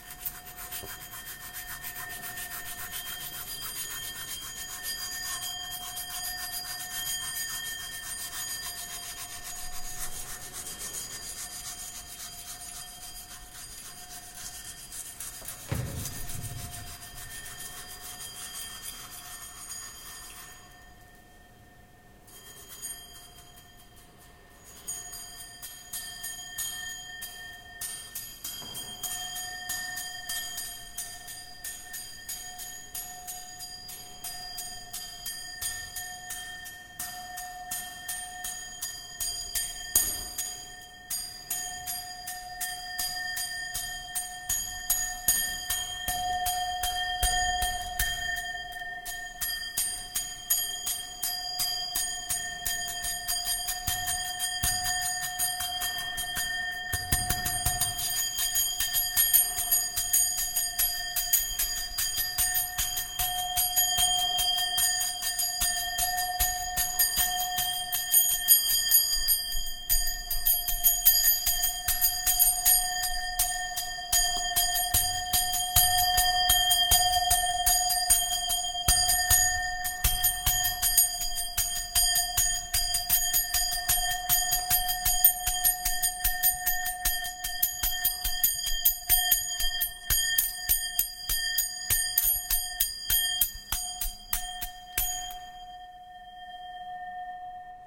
Nagra ARES BB+ & 2 Schoeps CMC 5U 2011. brush rubbed on a small bell
bell, brush, rubbed